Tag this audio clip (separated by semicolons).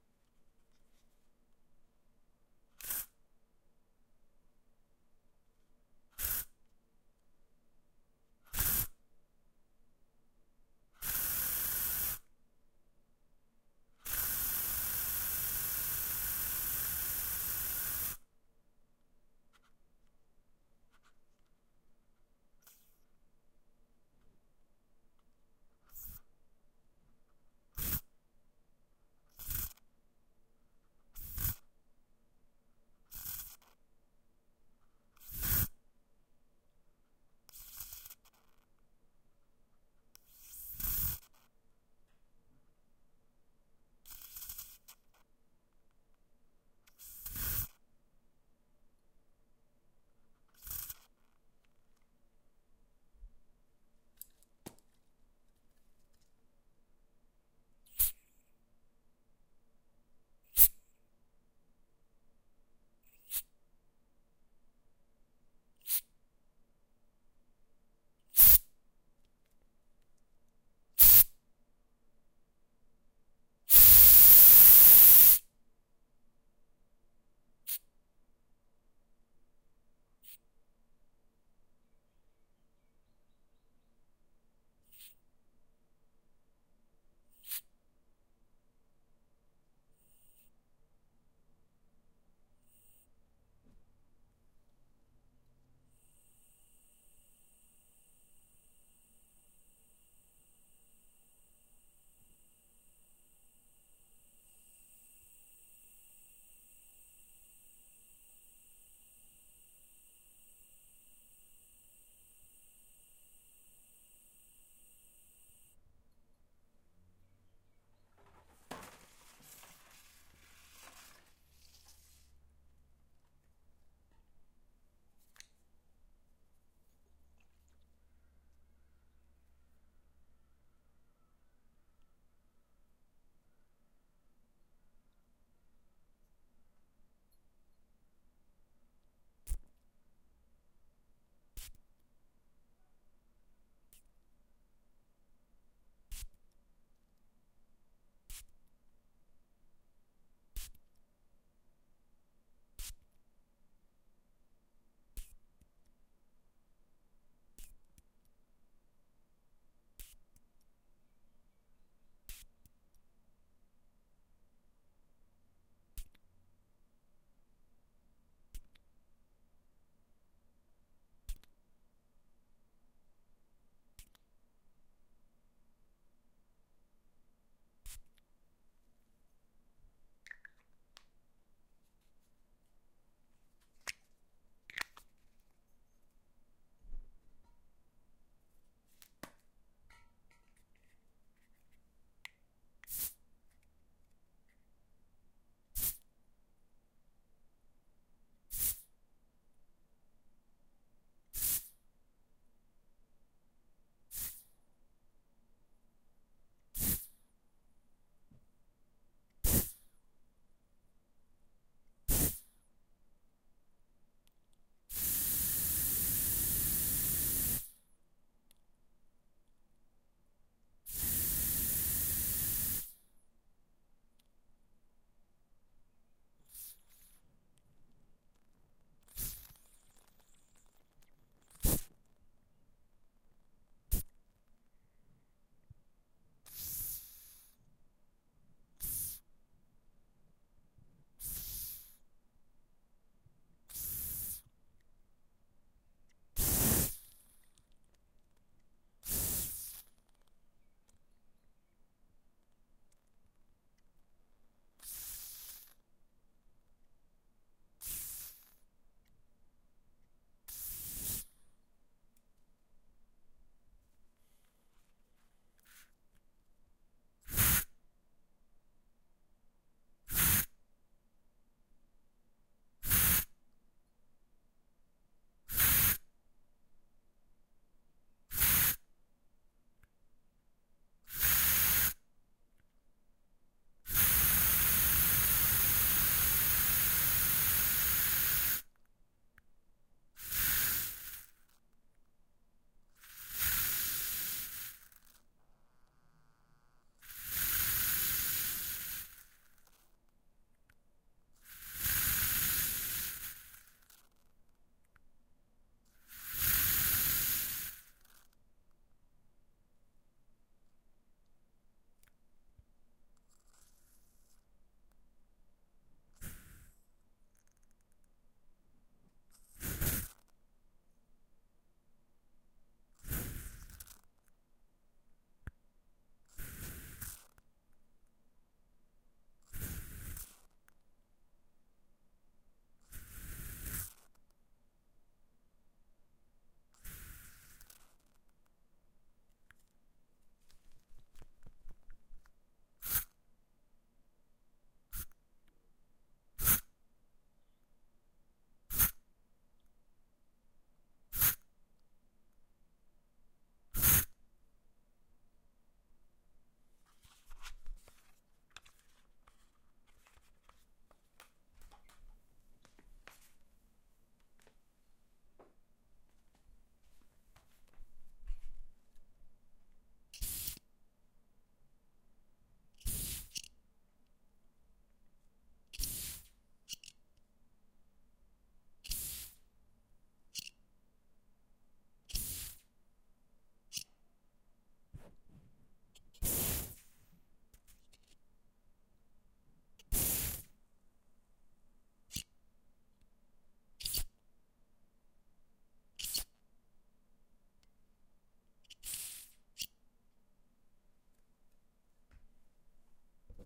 hiss; deodorant; steam; aerosol; perfume; air-freshener